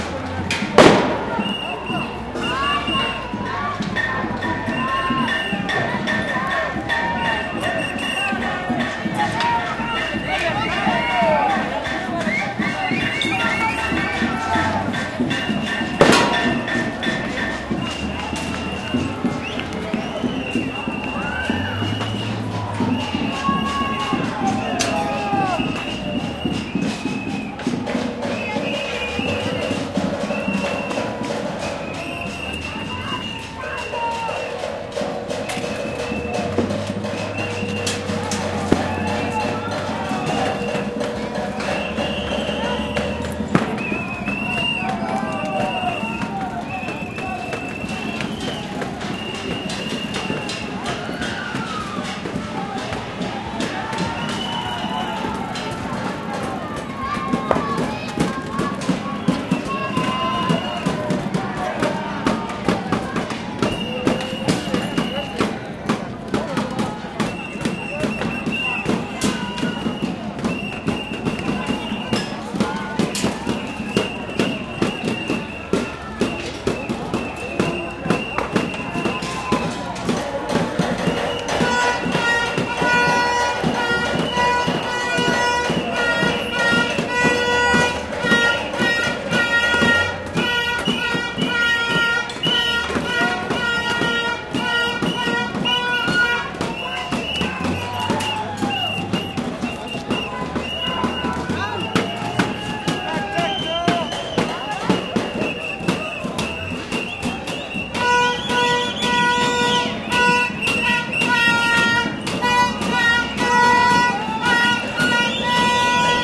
Protests in Chile 2019 protesters throw stones at liner water truck
Protests in Chile 2019. The police arrive and the protesters calm down, then face each other slightly. protesters throw stones at liner water truck
de, manifestaciones, plaza